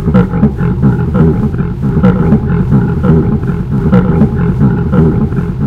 After recording someone making a sound like "trrrrrrrrrrrr", I've applied the following effects :1)Generate noise (red)2)I've modified the envelope of the noise in order to have it thin, then thick, thin-thick-thin-thick-...3) I've put down the volume of the noise, and put the voice louder.4) Add a few effect with Atom Splitter Audio : Distroyr.5)Mix the tracks together.